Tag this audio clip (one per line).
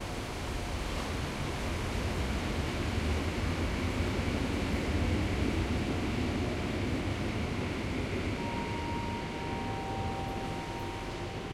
rail-way
railway
rail-road
rail